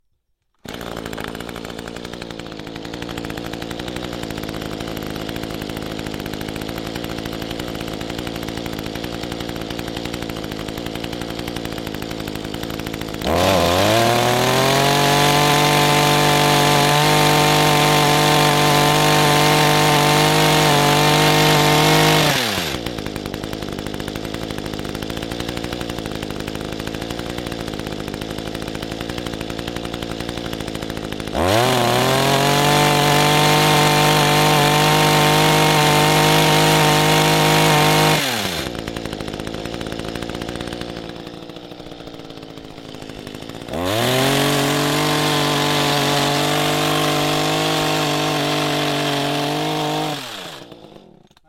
Sounds of a gasoline-powered hedge trimmer I recorded for a short film. I used the first take, so here is the second.
Start, idle, run, idle, run, idle, run while cutting, shut off.